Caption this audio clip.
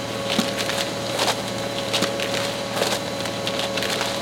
Dry Concrete
A mixer truck, freshly loaded is mixing (extremely dry) concrete in the drum as it spins on the frame of the truck! I held the mic to the exterior of the steel drum as it spun. So there are underlying CAT engine noises in the background. The concrete is so dry, its load enough to over power the majority of engine sounds.